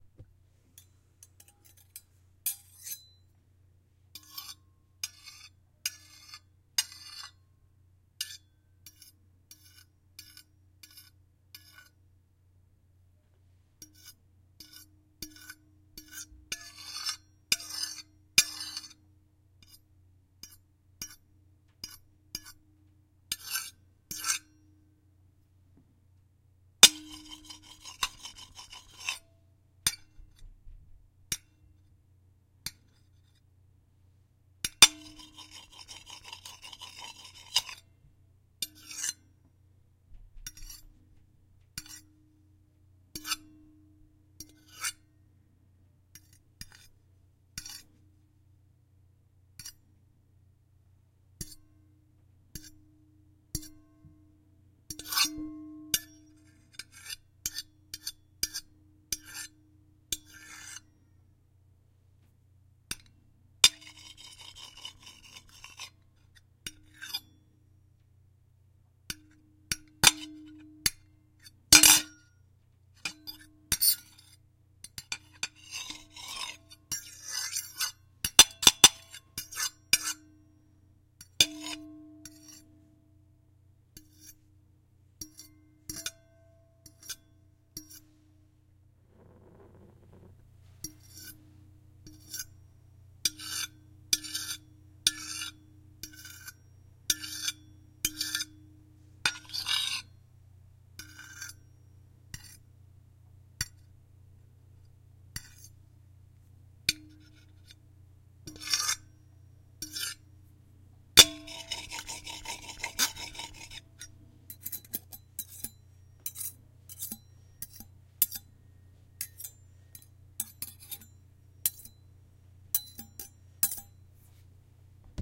Fork Knife on plate Scuffs and scrapes close to mic
Sounds of scuffing and scraping from a fork and knife on an empty plate recorded close to a mic.